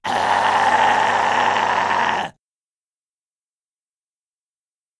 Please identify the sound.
Alex-HighGrowl2
High Growl recorded by Alex (another one)
voice, high, growl